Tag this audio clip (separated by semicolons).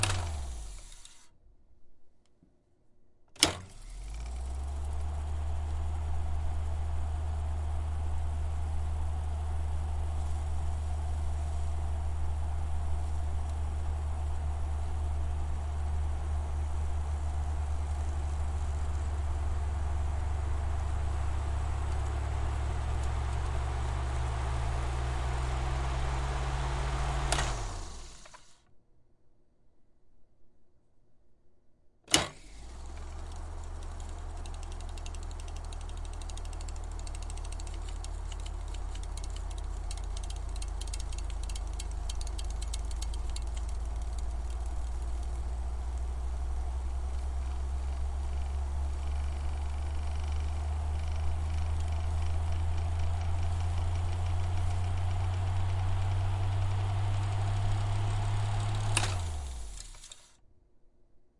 ffwd; machine; reel; rewind; start; stop; tape